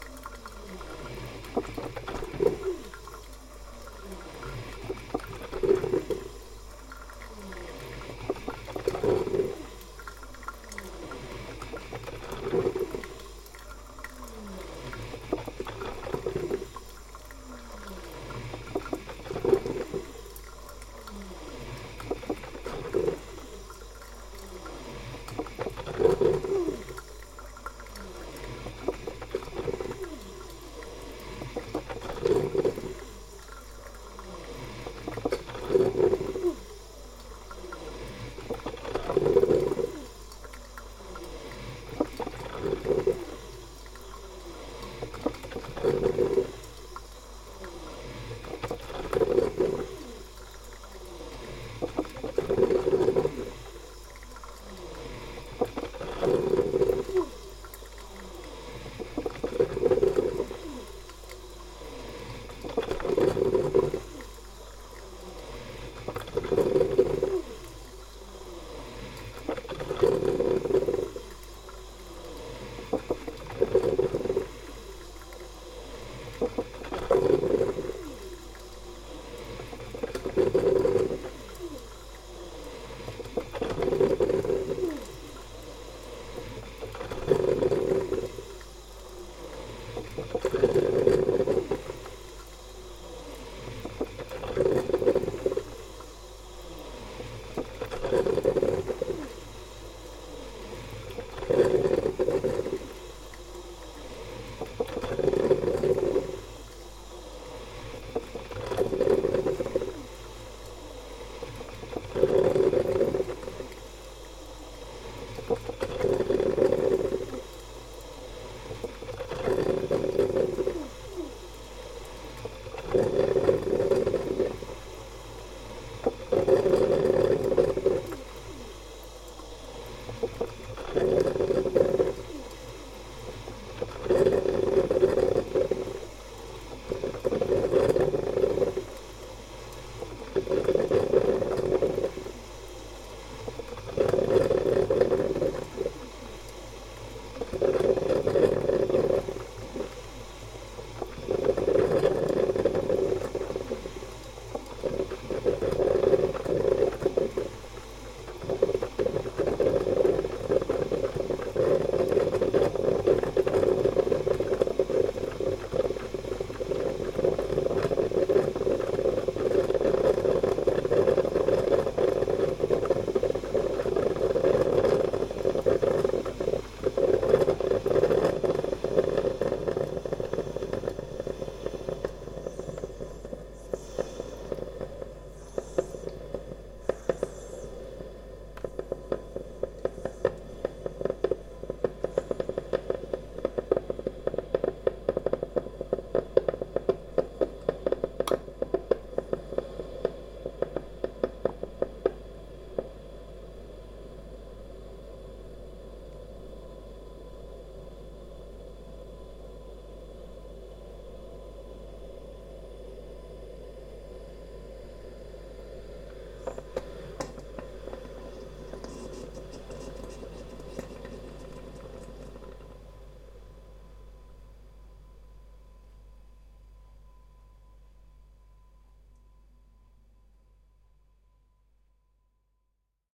coffee machine ending
Sound of a coffee machine
Kaffeemaschine